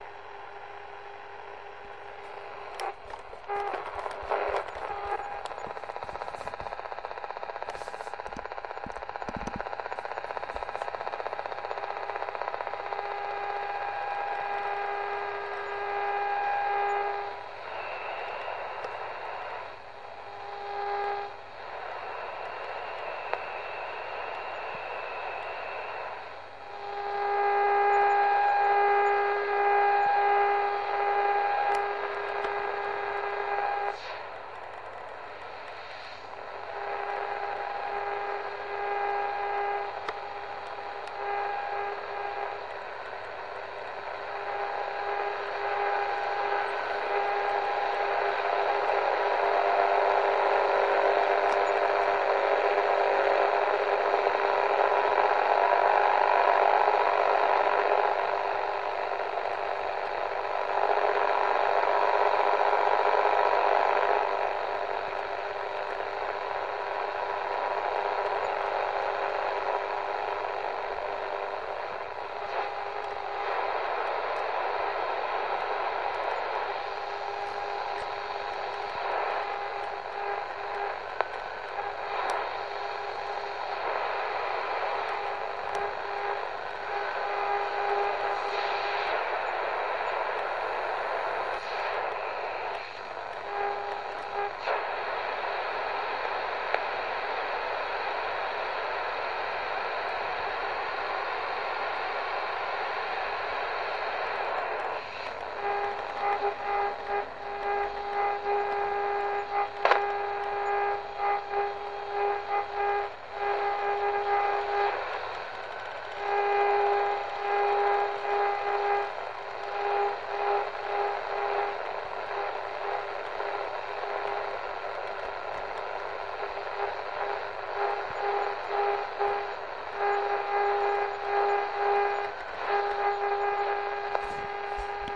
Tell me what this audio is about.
Exploring the medium wave frequencies. Everything here sounds creepy.
Radio used was a Grundig Yacht Boy 207 with a broken antenna, recorded on a Tascam DR-05x.